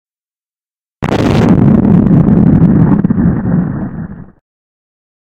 Mudstick Explosion1
Mudstick means that I hit a stick into a muddy spot and digitally edited the resulting sound. The 101 Sound FX Collection.